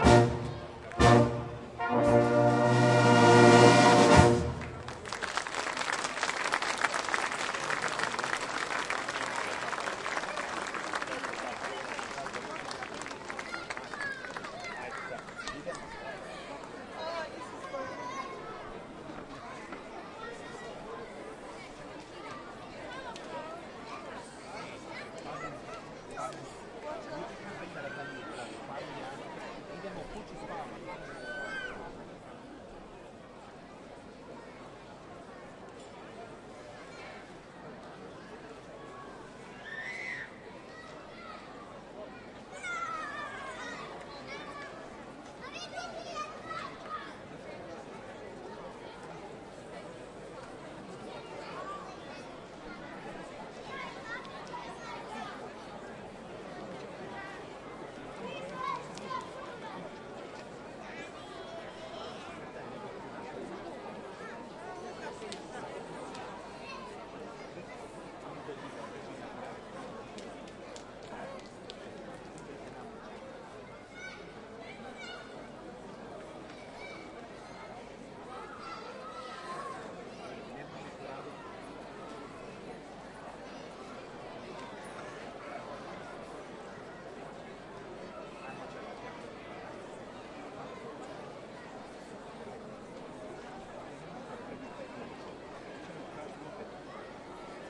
Pag CityMusic aplause children people
Town music on main town square on sunday after the music piece aplause and before next song children and people...The file is
unprocessed recorded from 3m height.